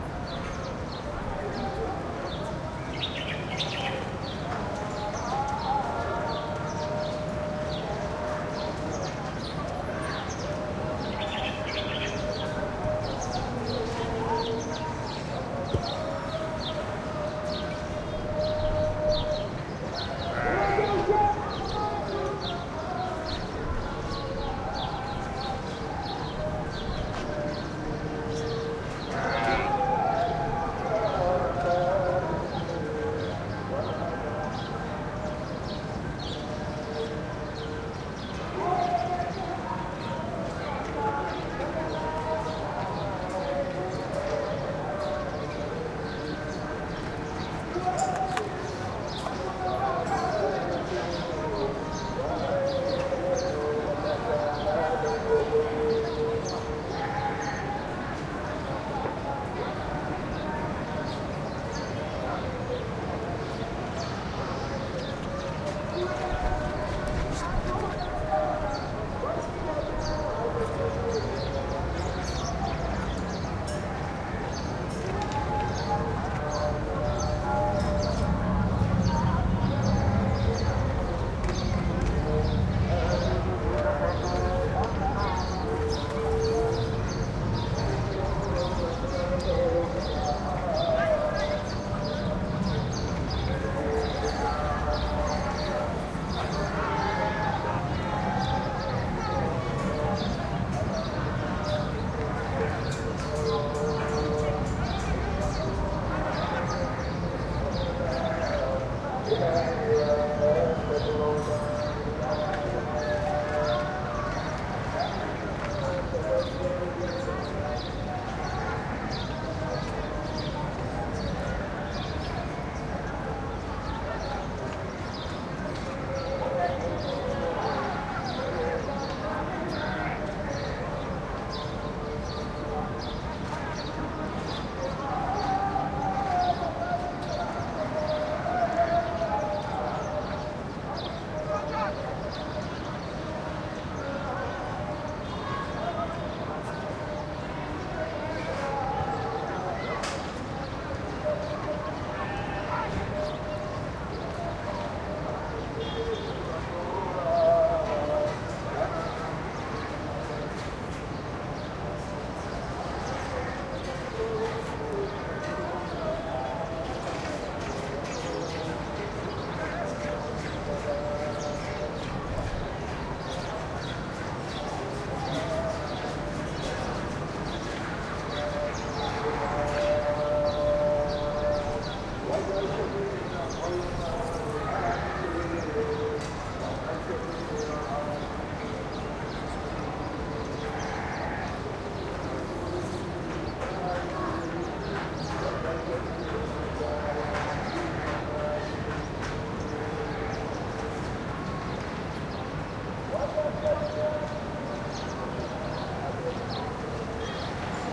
city skyline distant mosque call to prayer +traffic, kids, and sheep on roof nearby Dakar, Senegal, Africa
city, mosque, Senegal, Africa, skyline, call, distant, prayer